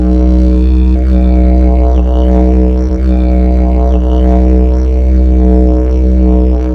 Short mono recording of a didgeridoo. Recorded with an M-Audio Microtrak II.
australia, ethnic, didgeridoo, didjeridu, musical-instrument, Australian